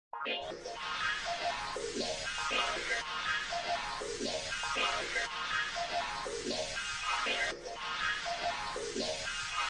Space Alarm
I may have used one of the Absynth instruments
to get this effect. It was a while ago however and
while I still think it's cool, I have a hard time
fitting it into my newer music.